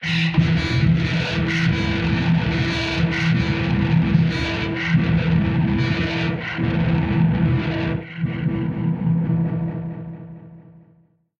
alot of processing went into this one. different sounds layered, individually processed then as a whole.